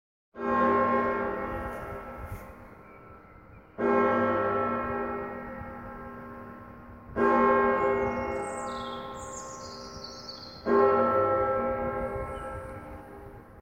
Bells Bong
Dong-dong-dong-dong
bell, bells, bong, chime, church, clock, dong, ring, ringing